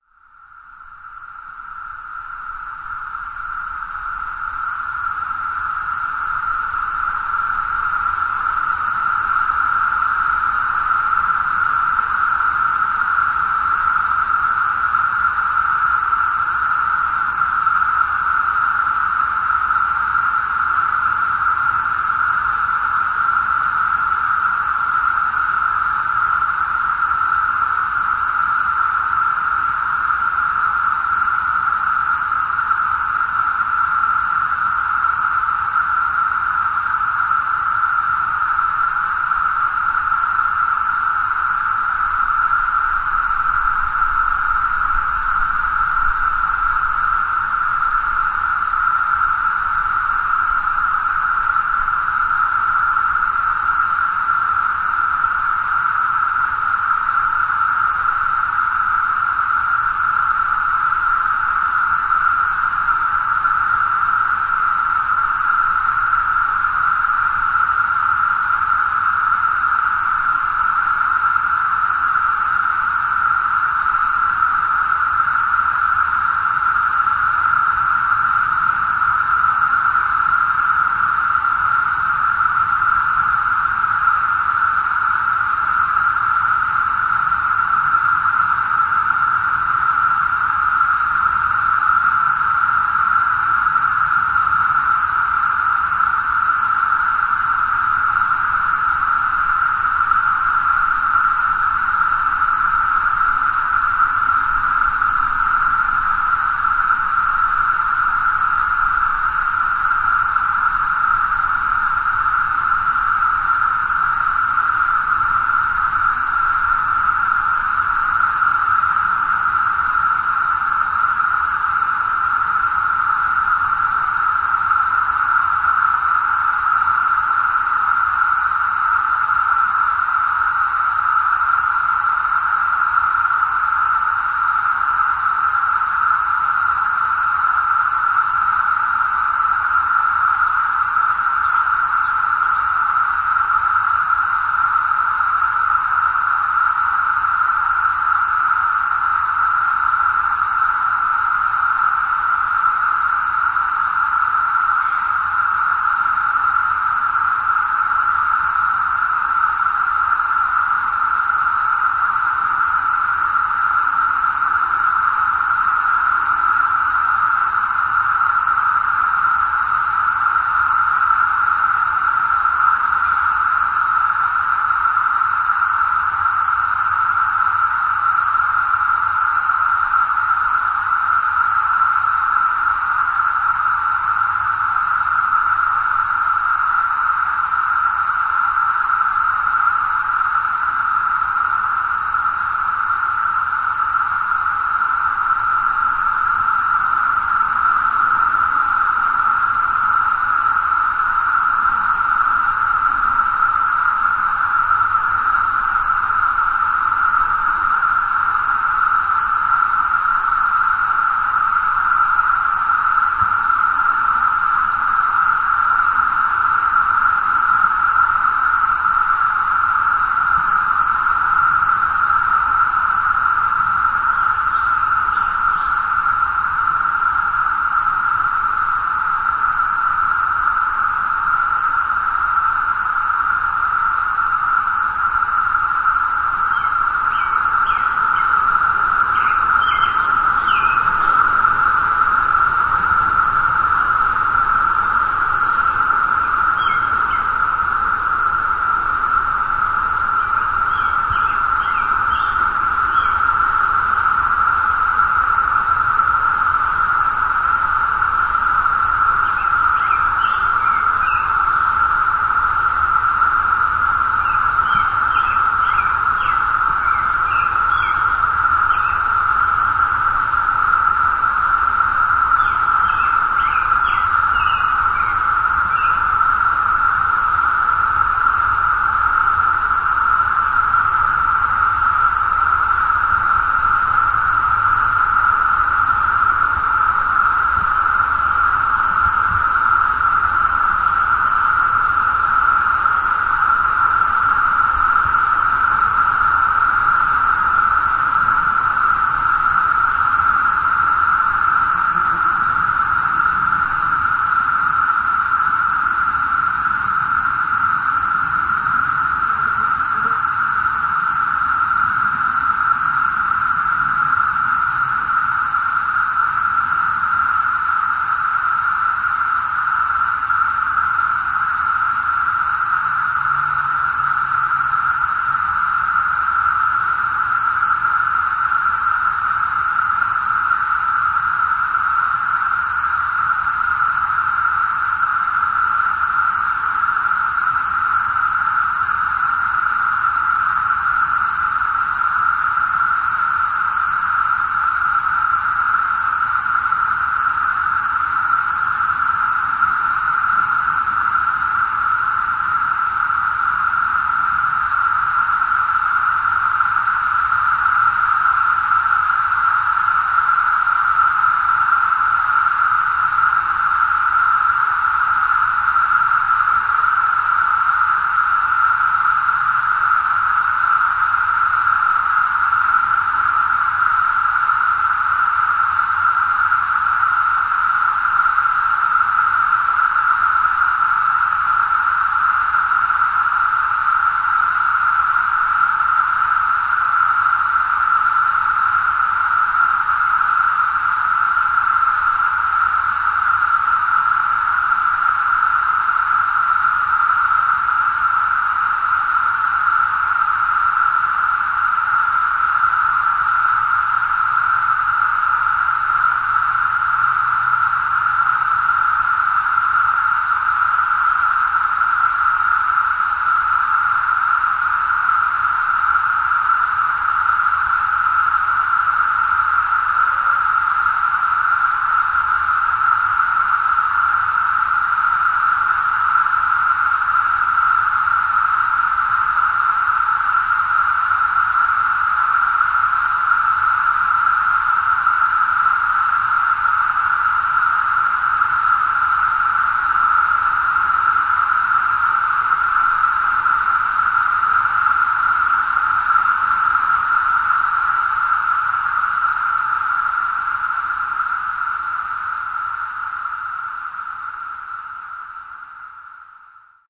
Cicada-Brood X
Field recording of Brood X cicadas made at Cheesequake State Park, New Jersey, USA on 6-10-13.
The sounds of these 17-year life cycle insects (Great Eastern Brood) will not be heard again until 2021.